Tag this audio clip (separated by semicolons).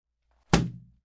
kick; boom